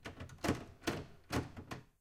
Locked doorknob rattle 3
Insistent testing of a locked doorknob recorded in studio (clean recording)
test, rattle, trapped, shake, locked, handle, jiggle, doorknob